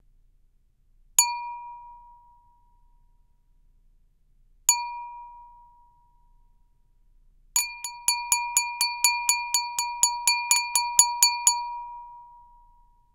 200809-WINE GLASS STRIKES 1
-Wine glass strikes
beverage, clank, clanking, clanks, dish, dishes, drink, drinks, glass, strike, strikes, striking, wine